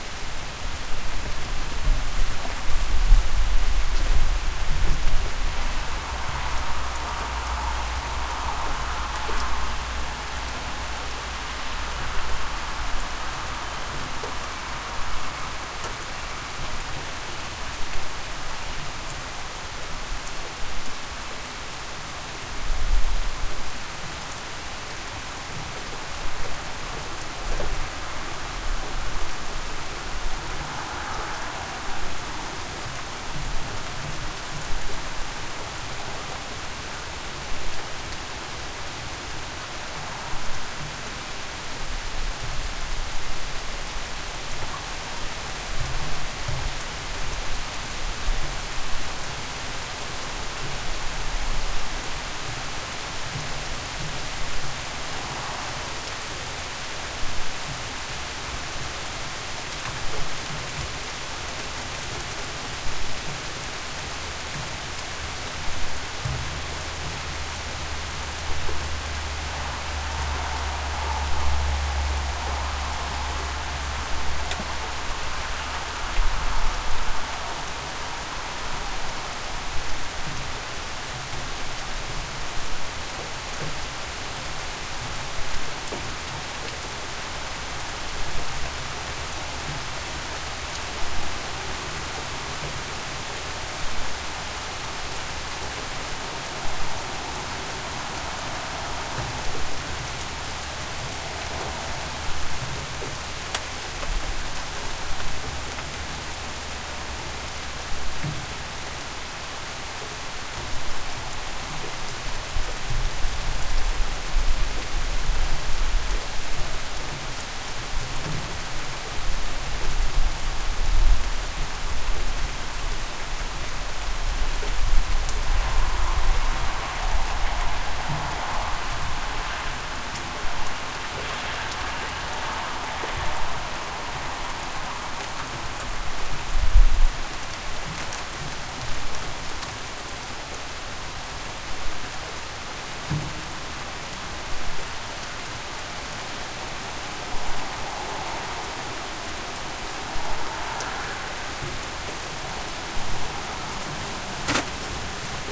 A storm with several instances of rain.
rain, storm